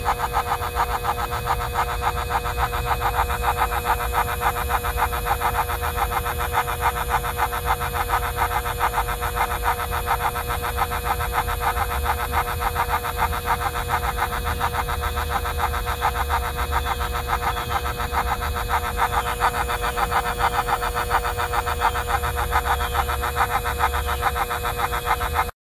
Air Duct Beat
An air duct vent I found outside. Can combine this with other machinery sounds to make an air plane propeller, engine, sci-fi weapon sounds, etc.
ac, air, blow, buzzing, duct, fan, hum, machine, vent